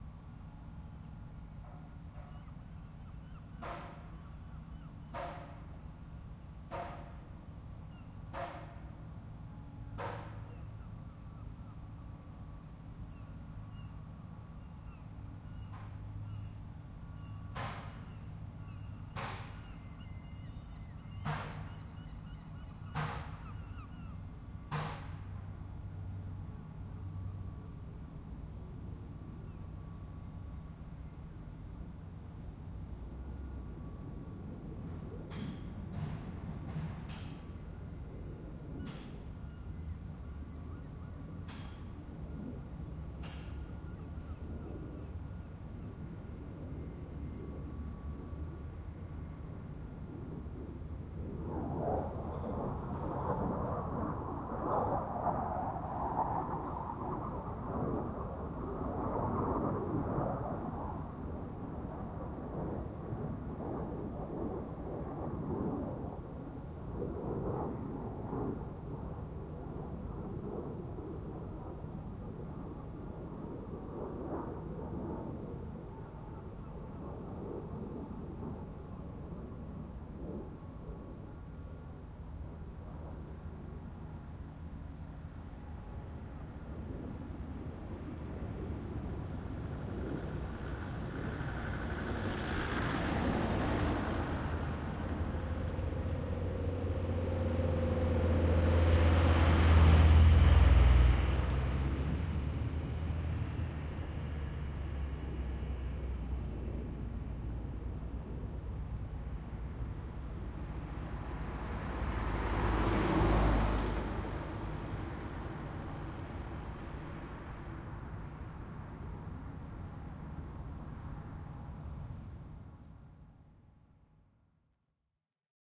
Distant Machinery, Plane Interupts, Followed by Cars, Special Guest Sea Gulls!
Starts off with a recording of distant machinery, but a plane comes along and interupts this, followed by a line of cars, plus the grand feature of Scarborough - Seagulls!- Recorded with my Zoom H2 -
machinery, cars, plane, motor